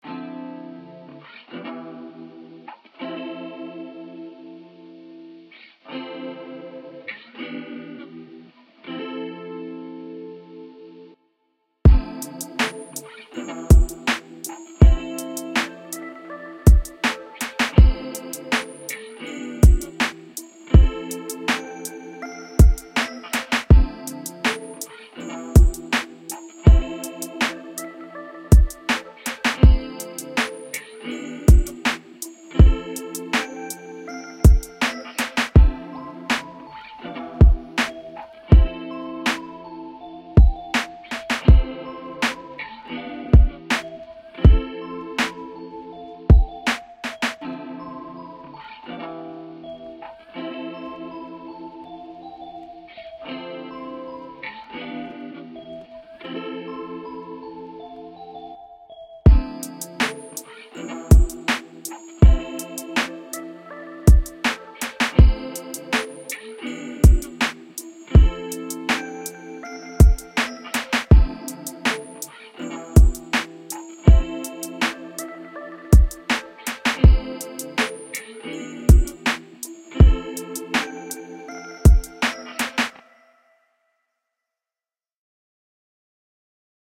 Lofi Loop Scoop
percs, drum-loop, perc-loop, lo-fi, groovy, music, garbage, lofi, beat, song, background-music, percussion-loop, quantized, instrumental, vintage, rubbish, hiphop